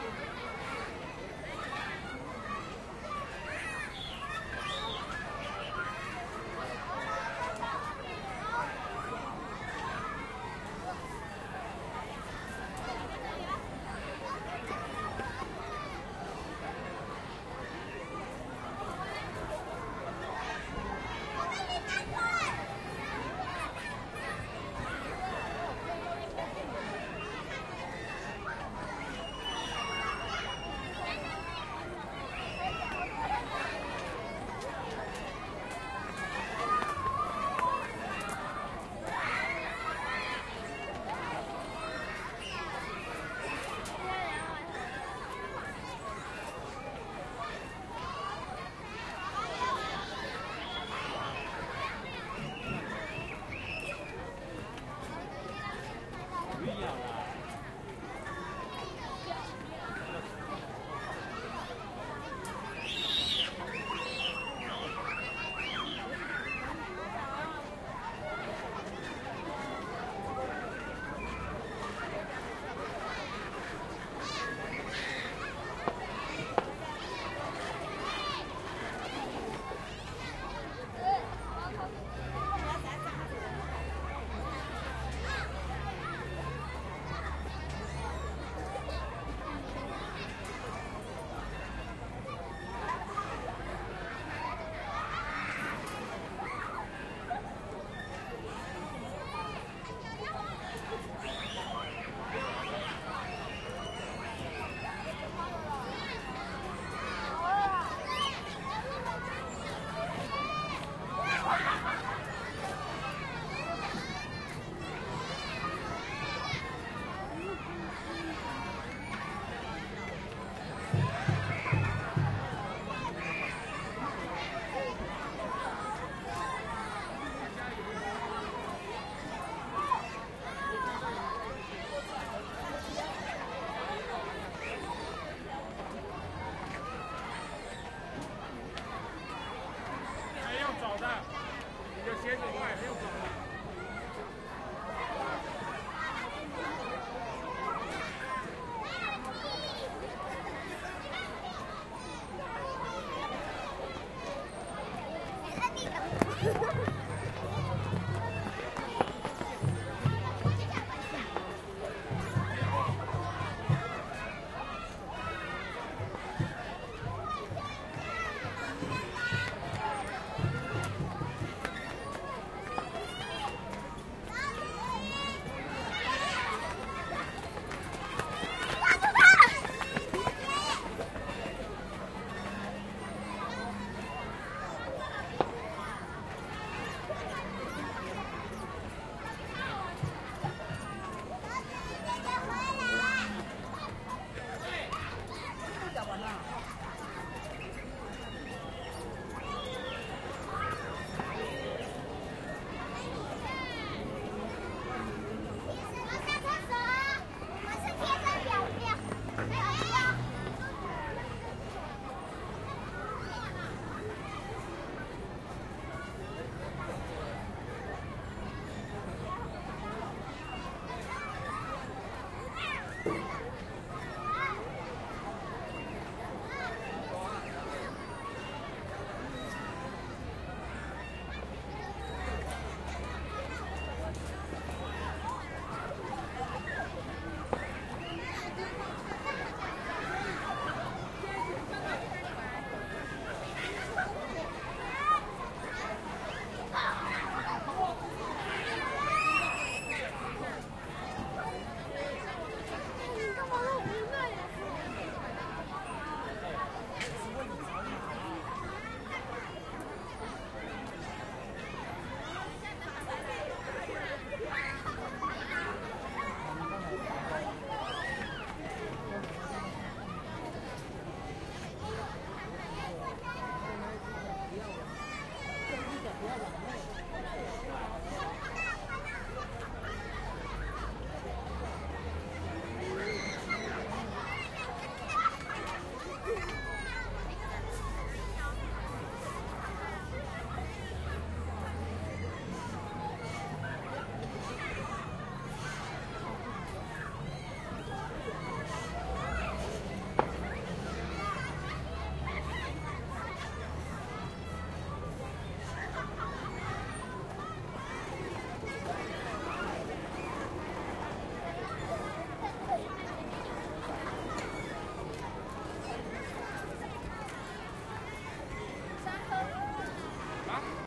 Recording with my Zoom H4next.
It was a beautiful Sunday afternoon at Zhongxing Children Park.